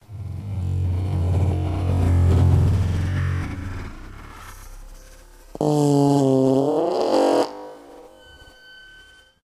Simulated ambient alien sound created by processing field recordings in various software.